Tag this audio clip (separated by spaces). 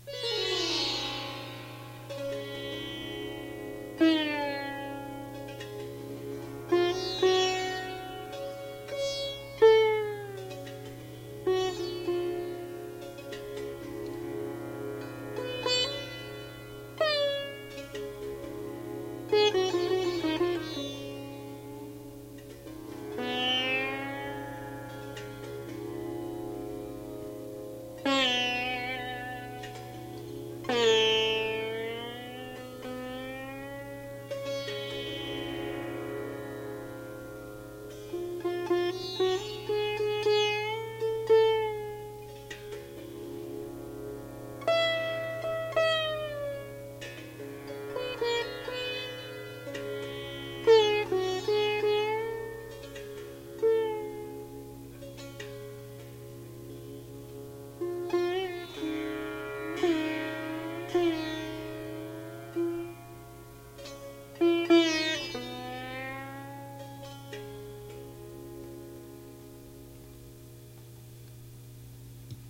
improvised Sitar